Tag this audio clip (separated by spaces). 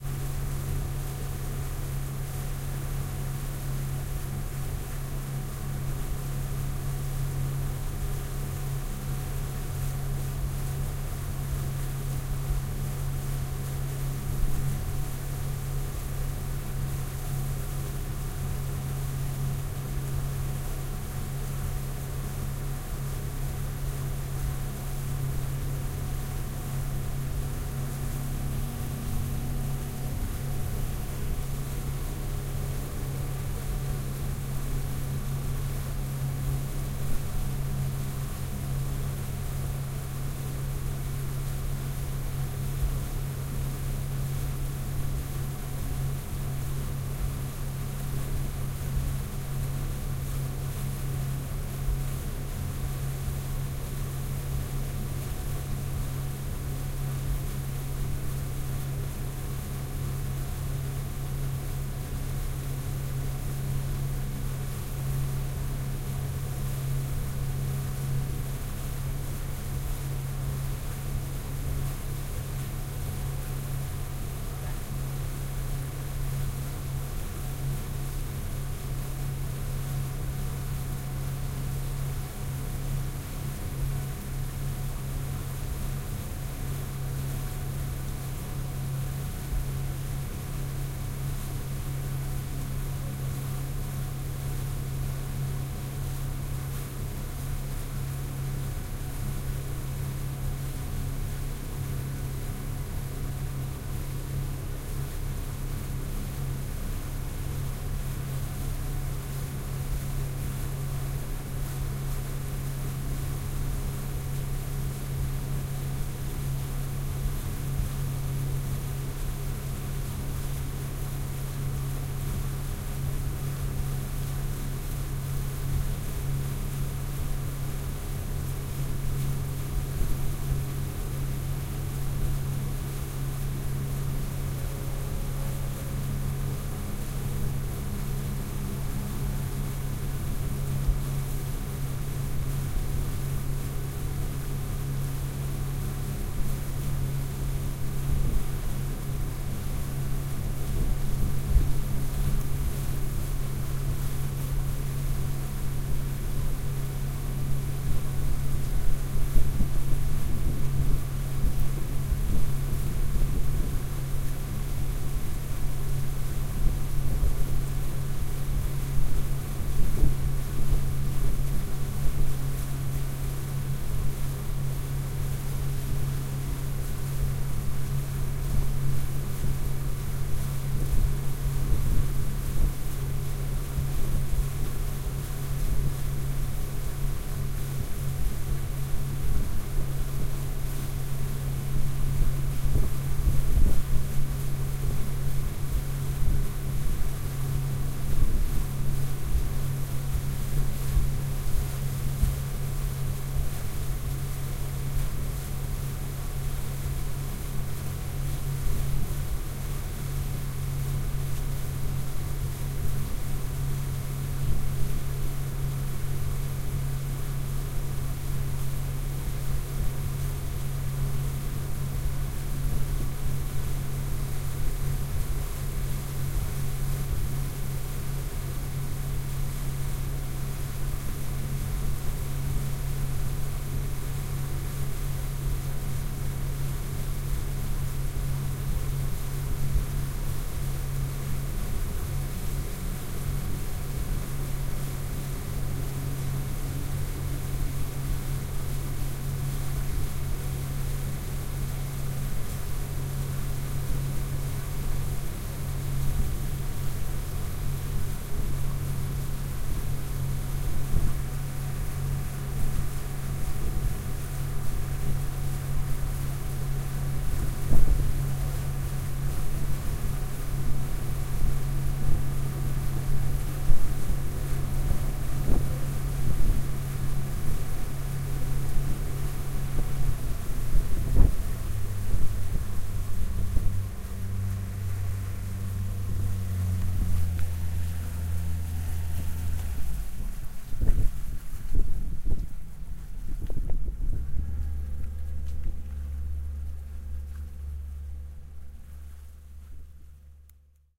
boat boating engine fishing lake motor ocean water waves